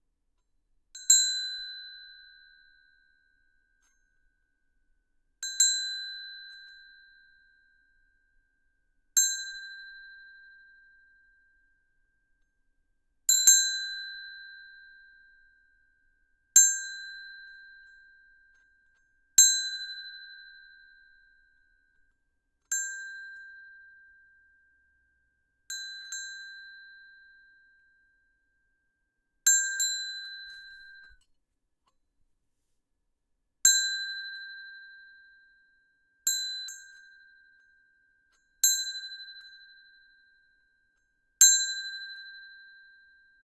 ringing a bell
clink, tinkle, cink, n, ring, bell, jingle